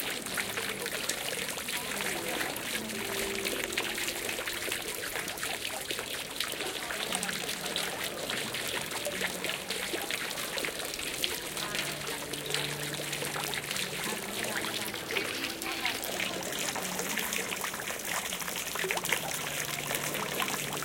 fuente.calle
sound of water falling (from fountain with 4 jets), some voices in background. OKM Soundman > iRiver iHP120 /sonido de una fuente pequeña con algunas voces en el fondo